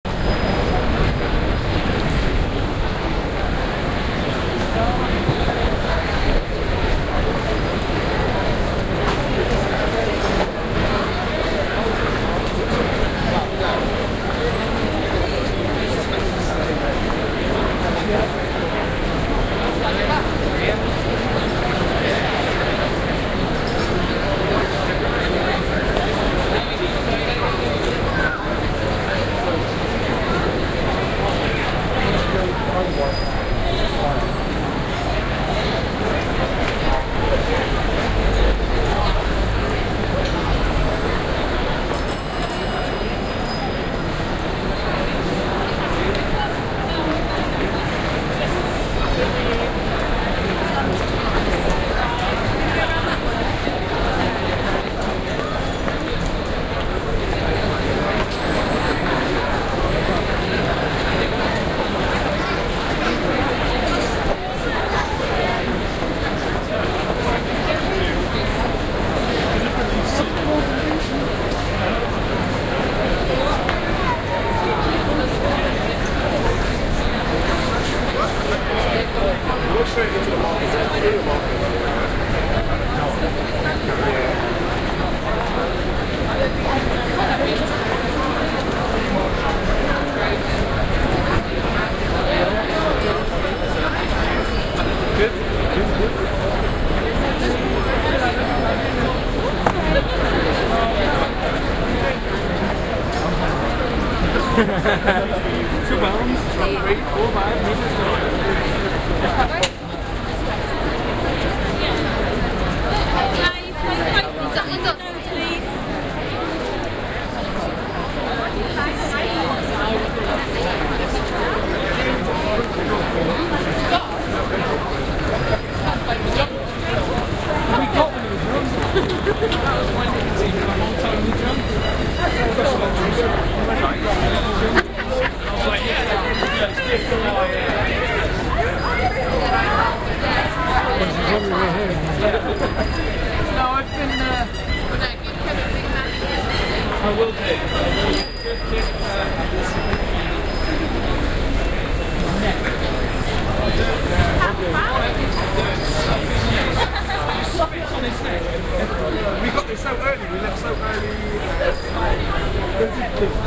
Covent Garden - Taxi Bike Bells